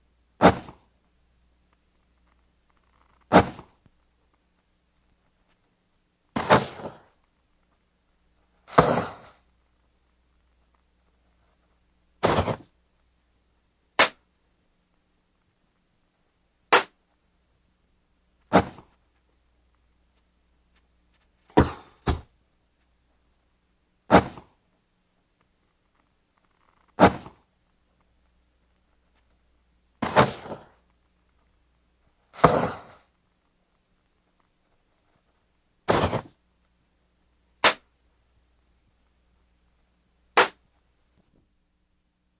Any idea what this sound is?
Old flap. Cloth/ sheet/ rip.